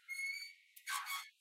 door metal sound